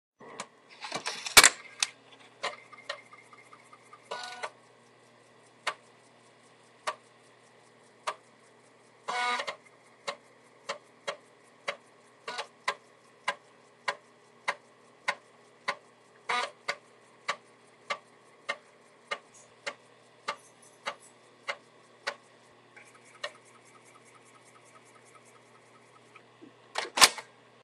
Inserting, reading and ejecting computer floppy disk (3.5")
computer, electromechanics, floppy, floppy-disk, machine, reading-floppy